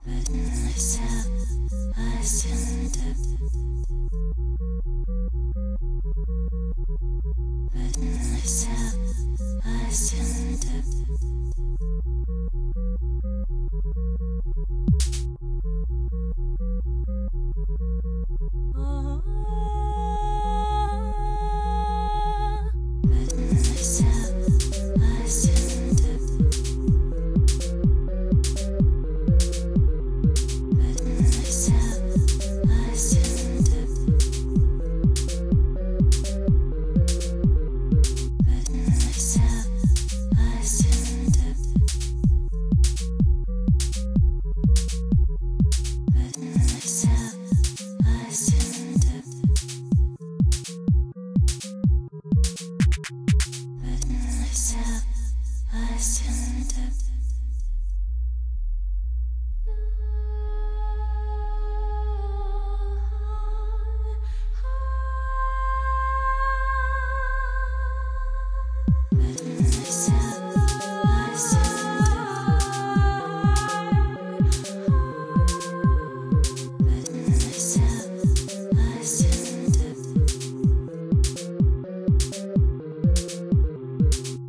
CHUCKING IT DOWN
This is part of the Electro Experimental. Peace and tranquillity with a lovely vocal (mild or Chilled TECHNO-HOUSE;).
and PSYCLE - recorded and developed October 2016. I hope you enjoy.